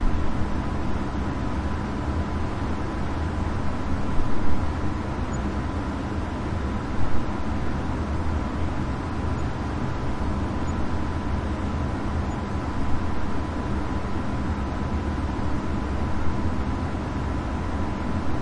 Industrial ventilation at hydroelectric plant
ambience, Fan, field-recording, Industrial, noise